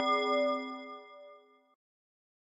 Simple Ding
created for a film where we restarted the scene whenever you heard the ding